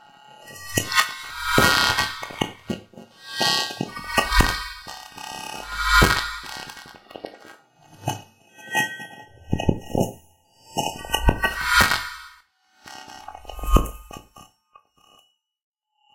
Attacks and Decays - 1
A series of synthetic attacks processed with small amount of AM and then put through noise reduction to smear the high frequencies.
electronic, experimental, spectral, synthetic